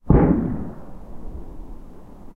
A stereo field-recording of a gas operated bird scarer that goes bang. Recorded from a long distance away with a Zoom H2 front on-board mics.